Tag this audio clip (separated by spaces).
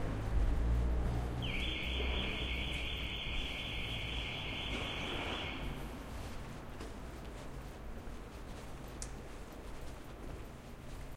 car alarm